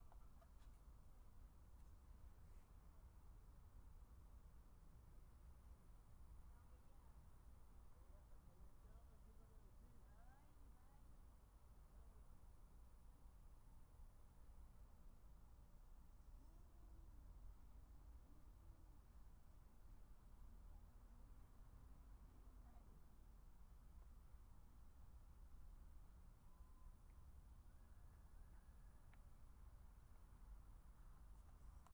Omni Ambiental ParkingLot
Cars, Lot, Parking